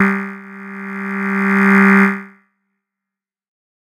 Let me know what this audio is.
This is one of a multisapled pack.
The samples are every semitone for 2 octaves.
noise, pad, swell, tech